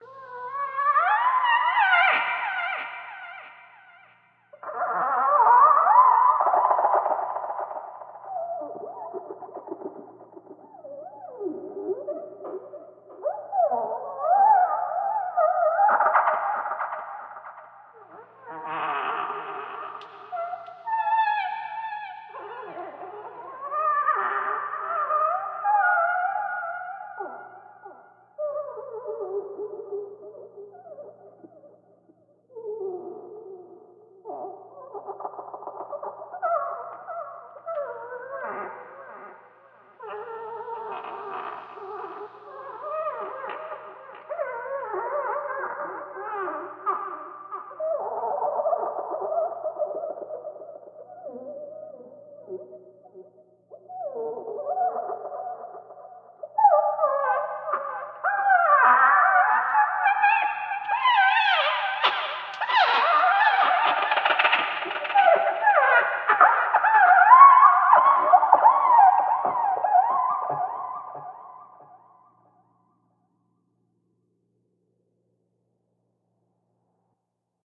Dolphin Song 2
This is a lightly processed version of my latest sound, Dolphin Song 1. I added a little echo, reverb, and eq to bring out that underwater/dolphin sound a little. Enjoy.